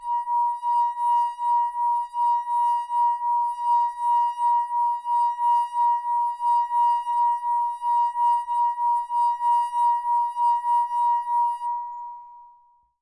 Crystal wineglass filled a bit with water. Moving the finger around the top for making the special noice. Use a ZOOM H1 for recording.